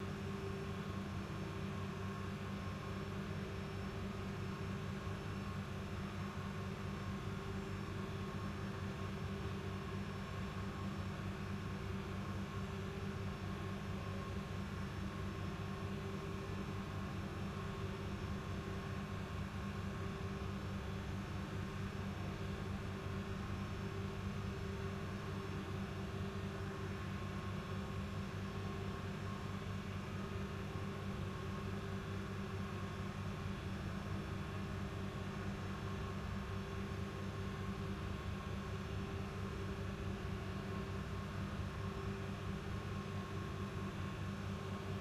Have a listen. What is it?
This is a recording of my air dehumidifier that has a strange sound to it, so I decided to process it, and now it sounds like a computer lab^^ Have fun with using it! After some editing it turns out to be loopable (this is the full recording)